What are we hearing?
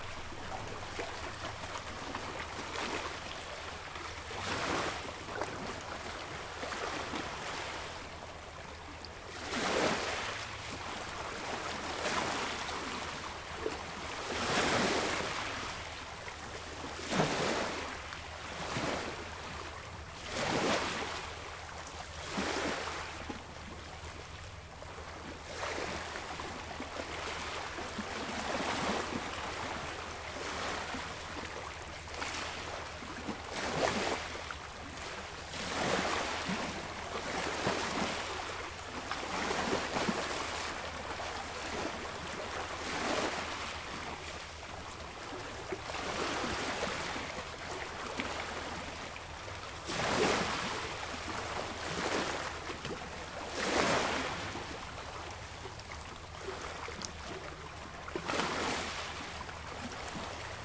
small beach nice waves
kostrena, rijeka, beach, sea